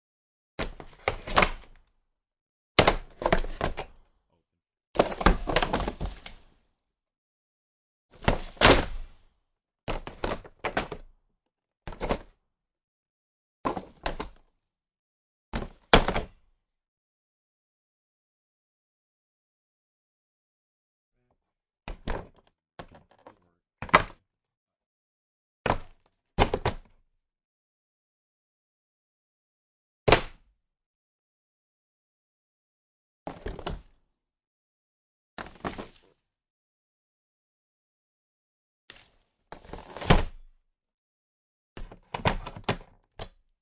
school bus truck int roof hatch open, close
bus, close, hatch, int, open, roof, school, truck